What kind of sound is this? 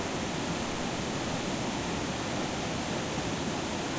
waterfall cycle
Small waterfall from Norway. Works well as a looping sound effect.
bubbling, field-recording, mountain, running, water, waterfall